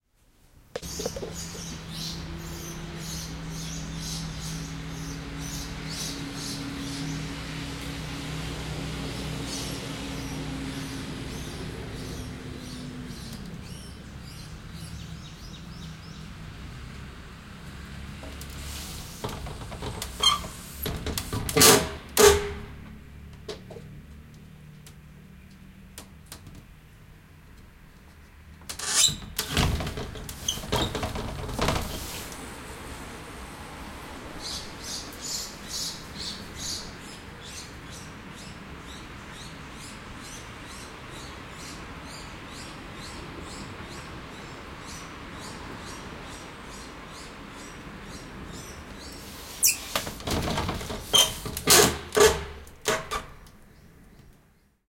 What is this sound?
Opening and closing a window at home. You can hear birds and cars passing when opened